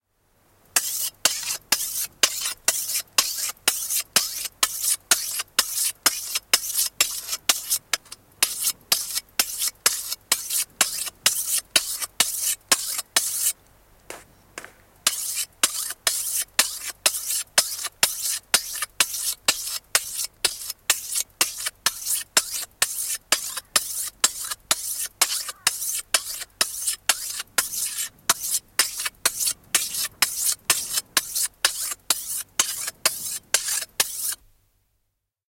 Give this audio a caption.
Viikate, teroitus, liippaus / Scythe, sharpening with a hone, whetstone, spit, a close sound
Viikatteen teroitusta hiomakivellä, liipalla, välillä sylkäisy. Lähiääni. Ulko.
Paikka/Place: Suomi / Finland
Aika/Date: 1978
Exterior, Field-recording, Finland, Finnish-Broadcasting-Company, Hiomakivi, Hone, Kovasin, Liipata, Liippa, Scythe, Sharpen, Soundfx, Suomi, Tehosteet, Teroittaa, Teroitus, Viikate, Whetstone, Yle, Yleisradio